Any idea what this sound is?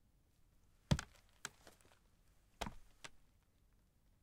FS Wooden Stairs
Foot Steps Down the wooden stairs
stairs, wood, downstairs, wooden, FS